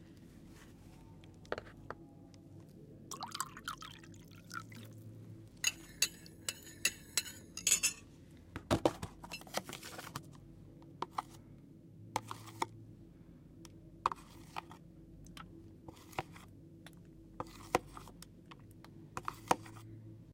Caneca, garrafa d`agua, pote de plastico e bolacha. Efeito sonoro gravado nos estúdios de áudio da Universidade Anhembi Morumbi para a disciplina "Captação e Edição de áudio" do cruso de Rádio, Televisão e internet pelos estudantes: Bruna Bagnato, Gabriela Rodrigues, Michelle Voloszyn, Nicole Guedes, Ricardo Veglione e Sarah Mendes.
Trabalho orientado pelo Prof. Felipe Merker Castellani.